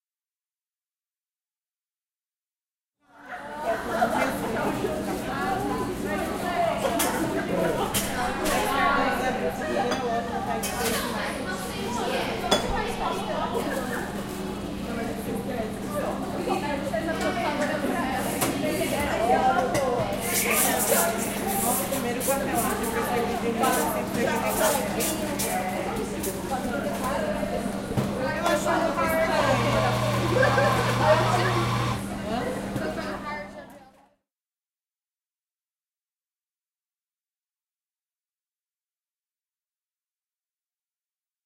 som ambiente do starbucks (cafeteria)